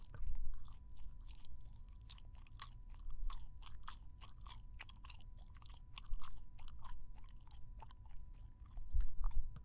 man chewing gum chewy